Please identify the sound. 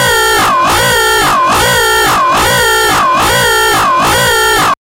quantum radio snap126
Experimental QM synthesis resulting sound.